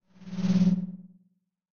Just a simple sound for teleporting or magic, etc.